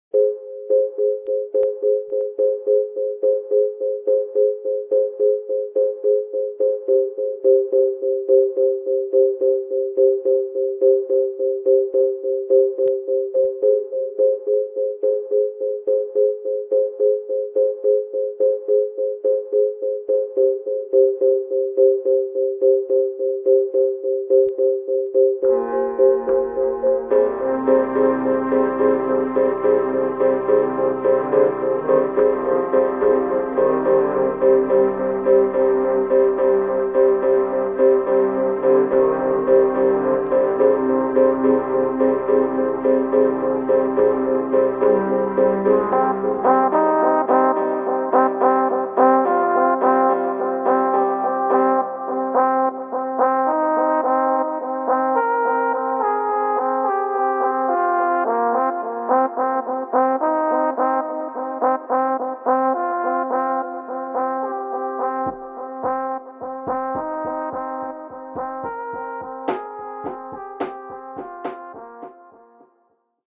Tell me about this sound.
Internal Flight
The sound of soaring or an outer body experience.
flight, understanding, spiritual